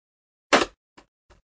This sound was Recorded on Iphone 6 and was cleaned up with audacity
The sound is of a Cardboard box being split in half by hitting it the box has weight inside so, one side swings hitting the side of the table.
Enjoy!